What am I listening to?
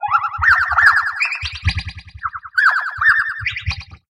First I've scratch a piece of plastic with my fingernail.
Next I modify it: - remove the noise
- add echo
What's more I multiply these sample by 2.